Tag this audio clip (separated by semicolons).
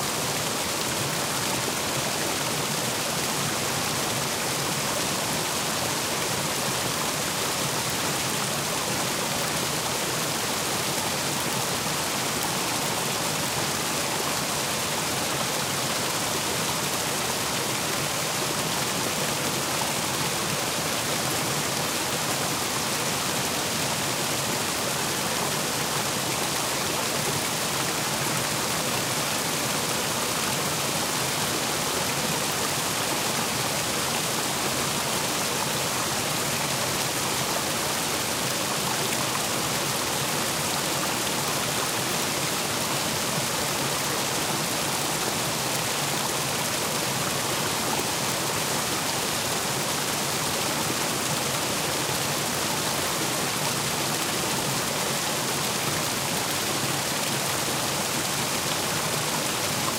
Stream; Ambience; Creek; Dam; Background; Nature; Flow; Mortar; Waterfall